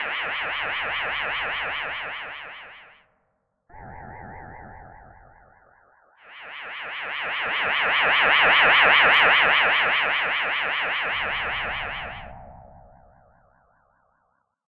Sounds a bit like a sound effect from space invaders.